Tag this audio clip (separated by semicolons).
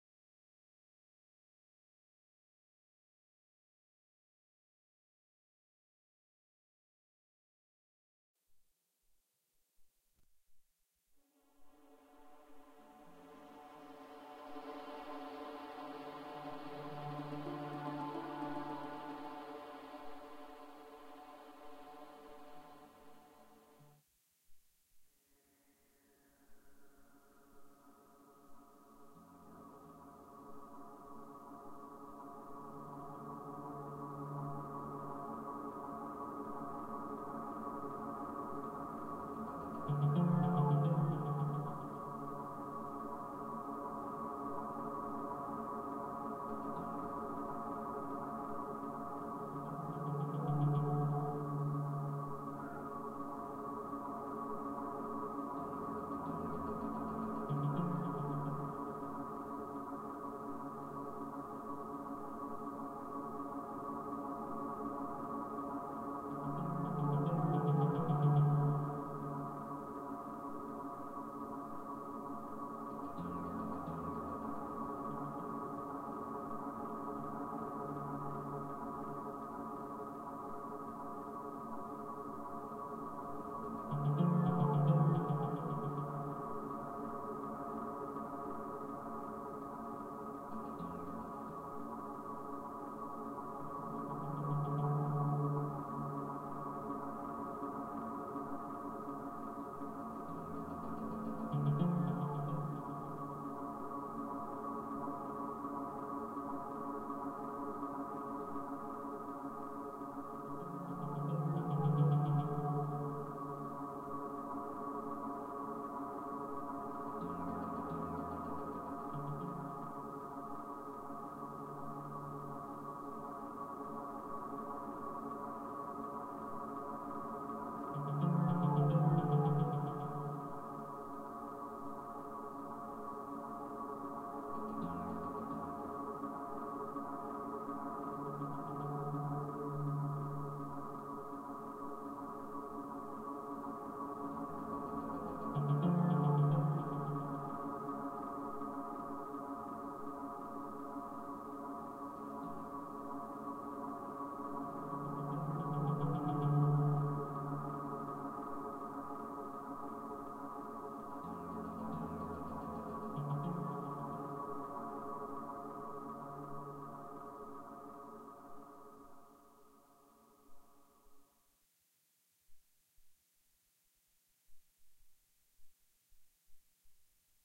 drone; film-scoring; suspense